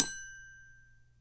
Multisample hits from a toy xylophone recorded with an overhead B1 microphone and cleaned up in Wavosaur.
xylophone, instrument, multisample